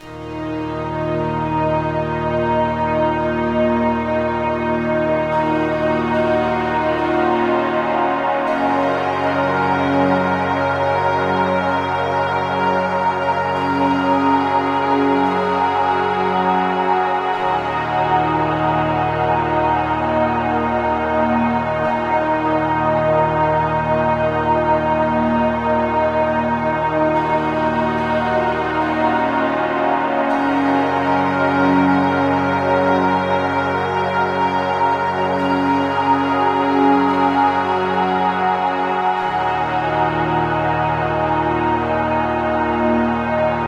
sad tune
dream, reverb, choir, sad, ambient, church